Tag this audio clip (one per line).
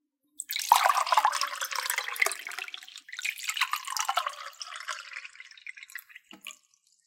liquid
glass
water
drink